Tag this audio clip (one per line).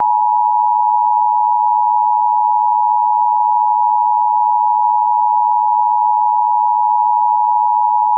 alarm
alert
beep
broadcast
channel
emergency
off-air
system
television
tv